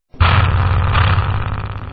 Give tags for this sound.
engine; motor; car

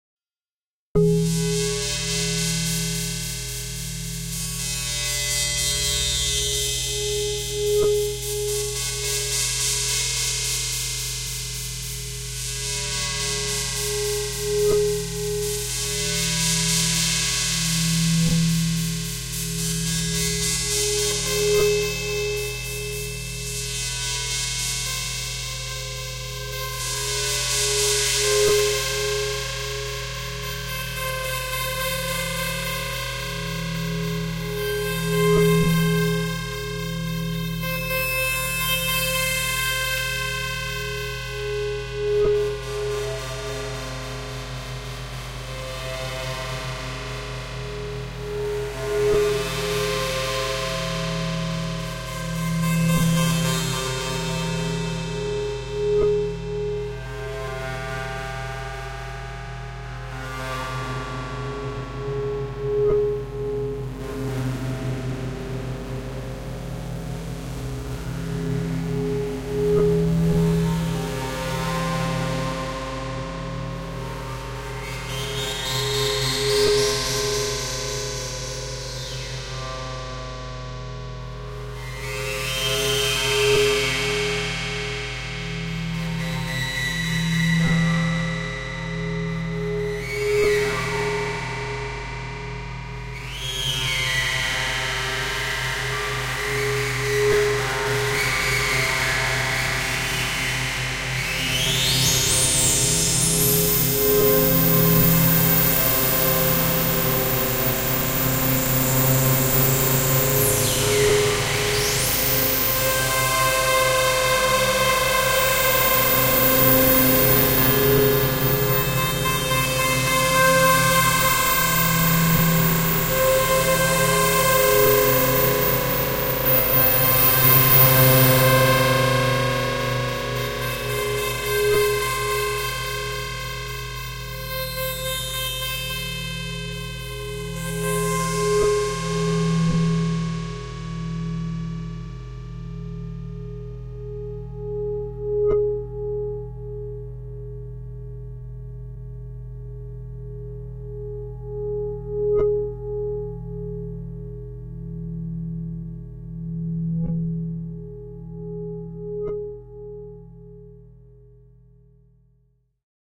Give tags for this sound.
deformed-keys; glass-grass; scenic; guitar-flageolets

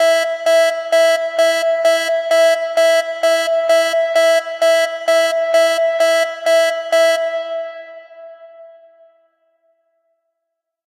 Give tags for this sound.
spaceship; alert; futuristic; space; digital; warning; sci-fi; noise; fiction; fire; electronic; alarm; energy; atmosphere; starship; future; engine; science; weird; alien; bridge; fx; emergency; hover; sound-design

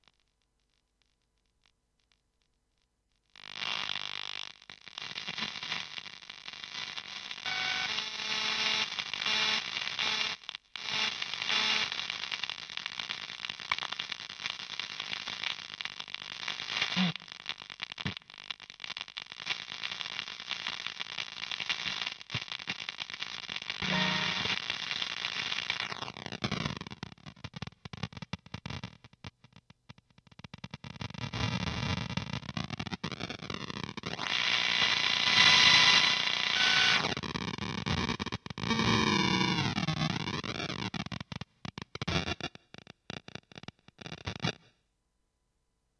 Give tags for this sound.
Geigercounter; Guitar; Noise; Sounddesign

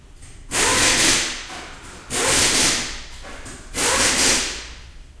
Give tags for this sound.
ambience; machine; garage; field-recording

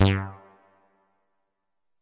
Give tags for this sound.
minitaur; moog

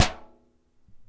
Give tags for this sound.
clang; metal; bang; crunch; kitchen; aluminum; pot; pan

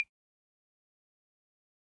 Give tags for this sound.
phone; percussion; africa; instrument

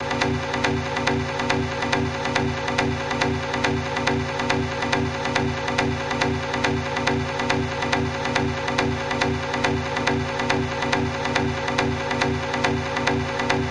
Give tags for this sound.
loop; electronica; dance; beat; processed

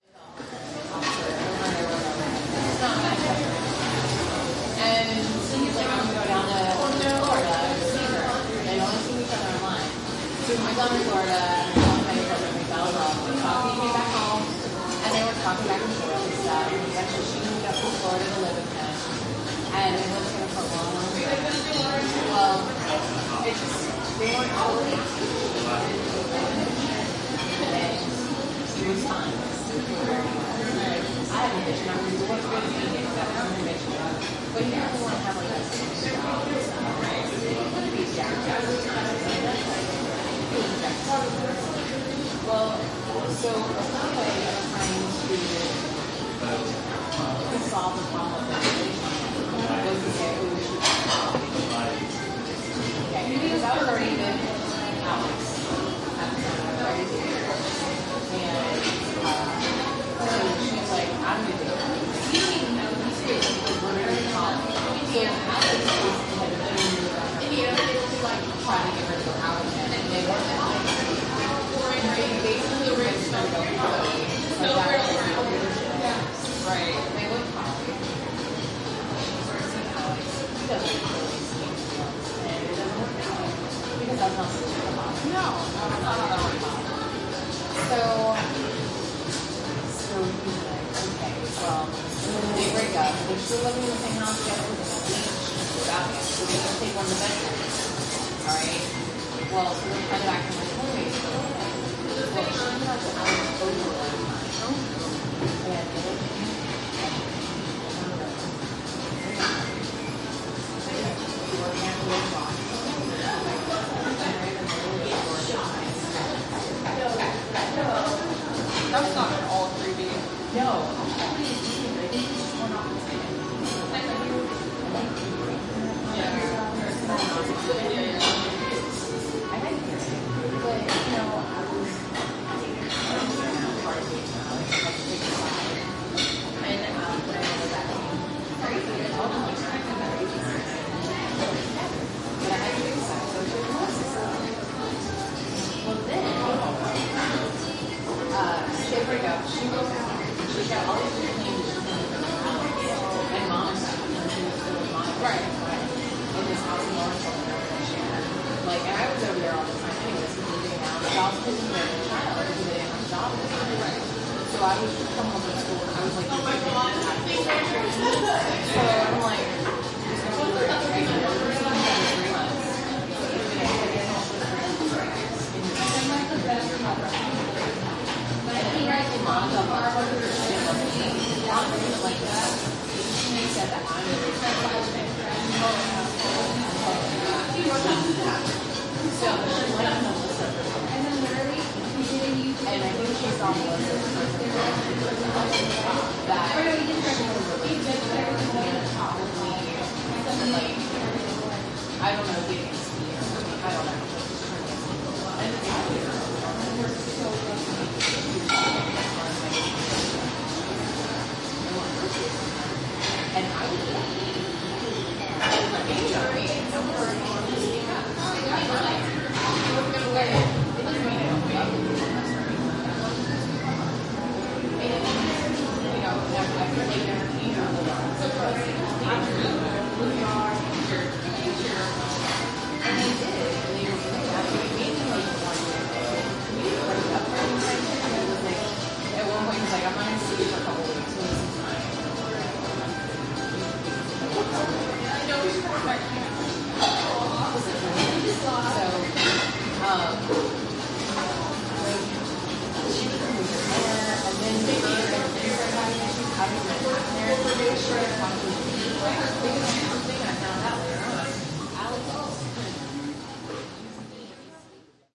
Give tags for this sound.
ambiance; ambience; background; binaural; breakfast; cafe; chat; chatter; diner; dinner; dishes; field-recording; food; general-noise; kevin-durr; lunch; people; restaurant; talking; white-noise

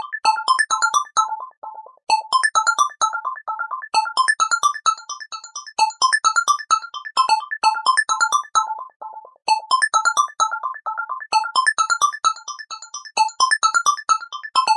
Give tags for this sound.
Beep; blip; bouncing; bpm; delay; echo; echoing; effects; lead; loop; process; sample; signal; sine; sound

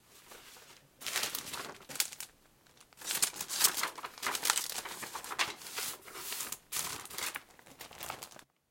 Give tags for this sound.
CZ; Czech; file; Office; Panska; papers

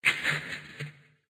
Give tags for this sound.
mama; robot; robot-voice; voice

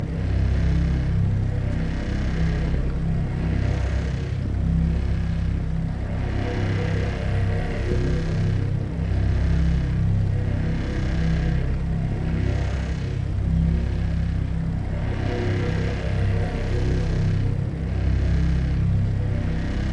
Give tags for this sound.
bunker; wind-turbine; drone; generator; buzz; power-station; star-wars; magnet; power; coil; game; turbine; force; magnetism; electricity; energy; underground; science-fiction; force-field; secret; danger

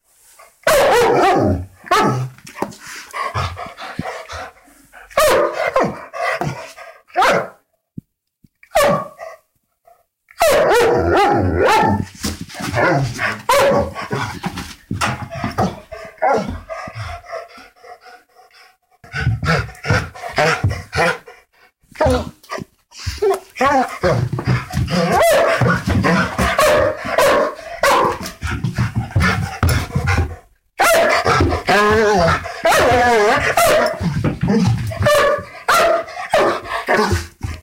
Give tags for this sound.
pet; whine; bark; animal; barking; dogs

